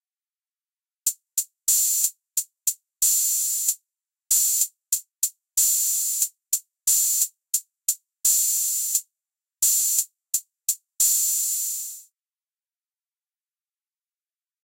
hihat open
hihat-open, loop